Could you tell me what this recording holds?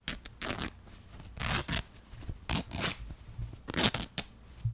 some cloth being destroyed